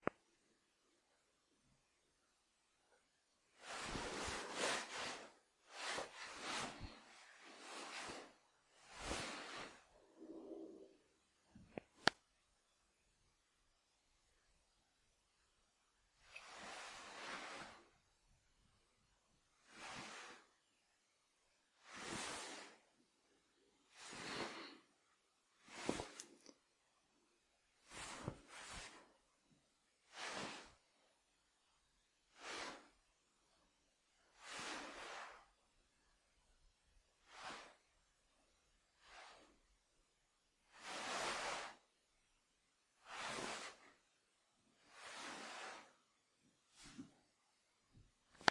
Cloth Rubbing
Recorded in closeup to a moleskin military jacket
Rubbing, Cloth, rustle, Moleskin, cruble